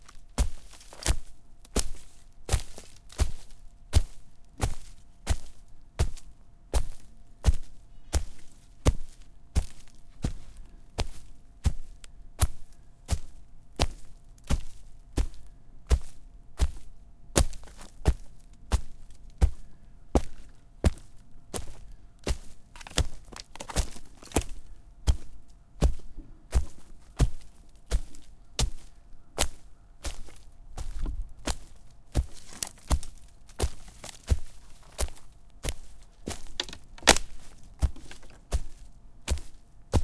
Stomping through the forest. Breaking snapping twigs. rustling leaves